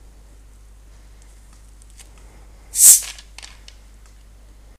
Opening a soda bottle.